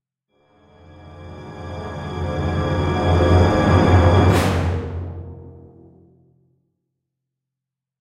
Robo Walk 01E
Orchestral suspense cluster using various instruments in a crescendo fashion.